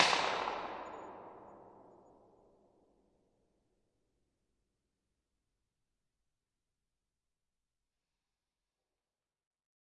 silo middle ambiX 0.5x
ambix reverb created with a cap gun in a silo recorded in AmbiX on a zoom H3-vr half speed for a longer and darker reverb sound
Ambisonic, AmbiX, Impulse, Response, Reverb